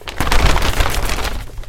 a grocery bag being shaken
paper, bag